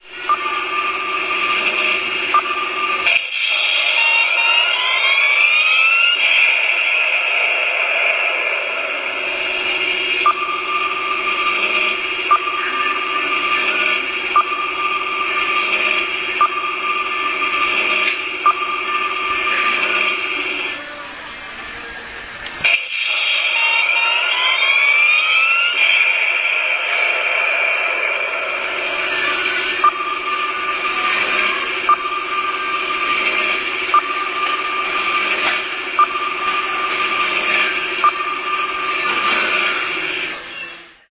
rocket toy 191210
19.12.2010: about. 20.00. rocket toy. M1 supermarket in Poznan.